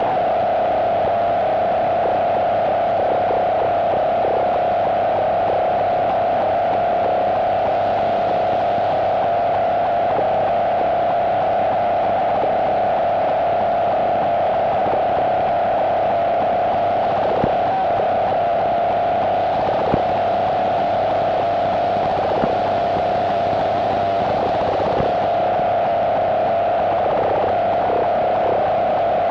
radio fuzz7
analog, comms, communication, digital, distorted, distortion, electronic, field-recording, garbled, military, morse, noise, radio, receiver, static, telecommunication, telegraph, transmission